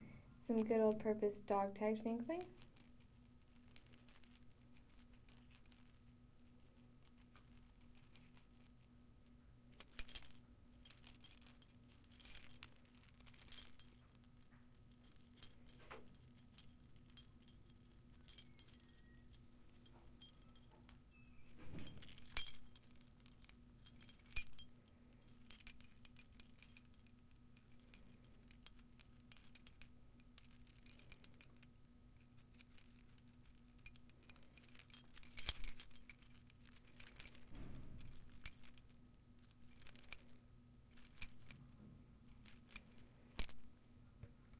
tinkling dog tags